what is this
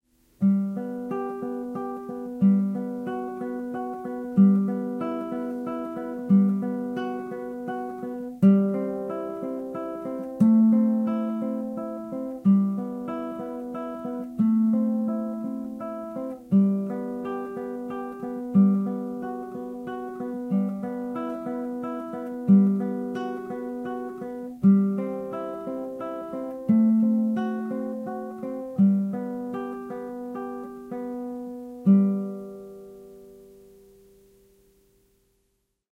PRELUDE IN G MAJOR
This is something that my on-line teacher has me playing. I took up the guitar again and even though this is a short piece it has a certain beauty to it. It should be played faster, but I think it also sounds nice at this tempo. The recording has a hiss and I don't know why as my recorder is a Yamaha Pocketrak. I tried editing out the hiss in Sound Forge but it also took away some of the music sound, so this is it as recorded. Thanks. :^)